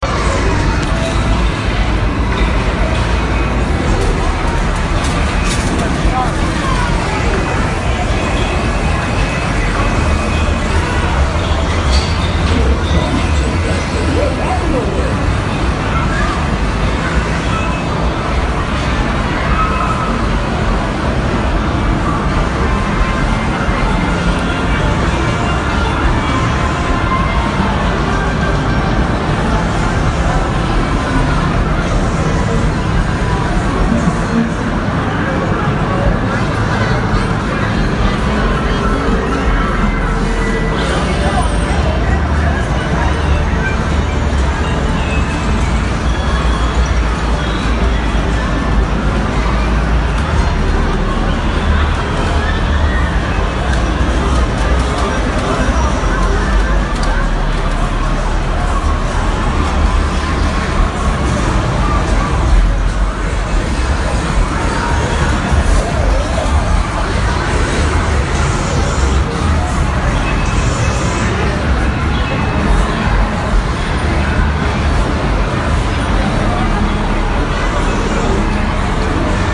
Vegas Arcade
Took this clip at a Las Vegas casino. Not much casino sound. More background sound indoor
Ambient, background-sound, atmosphere